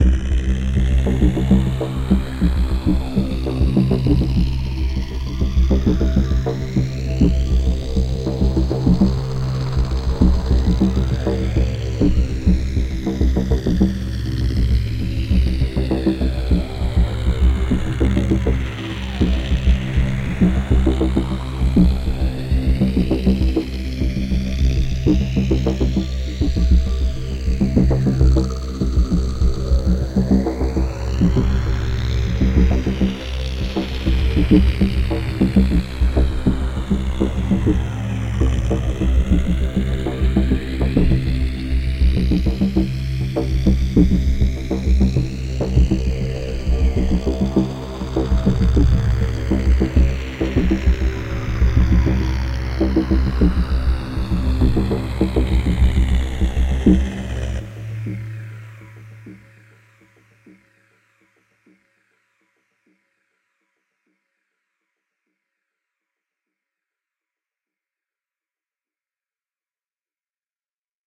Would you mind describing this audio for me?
Insects Of Saturn
alien, ambient, ARP, background, bass, creep, danger, deep, insect, loop, low, Saturn, space, swarm, warning